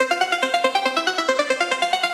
Arp Lead 140 BPM
A lead arp created using Access Virus C and third partie effects.